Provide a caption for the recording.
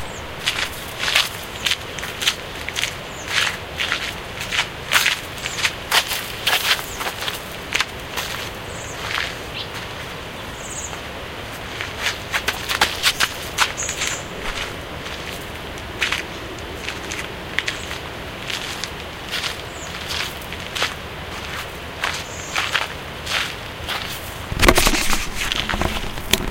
Walking around in the park near the gantries in the morning. Birds, water, wind gravel, walking, running.